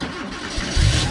Ignition Porsche growl
automobile, car, engine, ignition, sports, vehicle